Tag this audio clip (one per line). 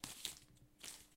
Leaves
Plant
Vegetation